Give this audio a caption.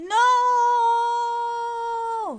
nooo-without effects
A girl screaming "nooo!"
girl, no, nooo, scream, woman